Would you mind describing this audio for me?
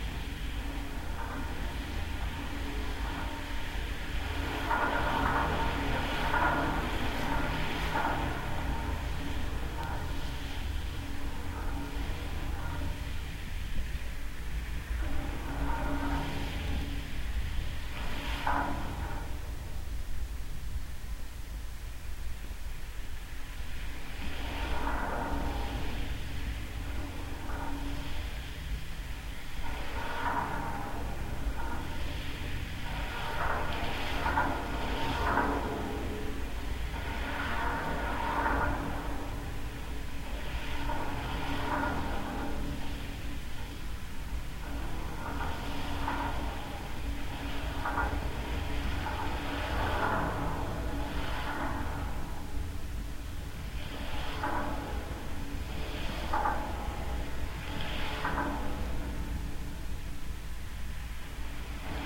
GGB suspender SE56SW
Contact mic recording of the Golden Gate Bridge in San Francisco, CA, USA at southeast suspender cluster #56. Recorded December 18, 2008 using a Sony PCM-D50 recorder with hand-held Fishman V100 piezo pickup and violin bridge.
bridge, cable, contact, contact-microphone, field-recording, Fishman, Golden-Gate-Bridge, piezo, sample, sony-pcm-d50, V100, wikiGong